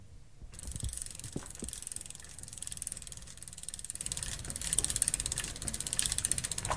the wheel of my old bike rolling freely on the air, producing the characteristic sound of a..mmm... bike.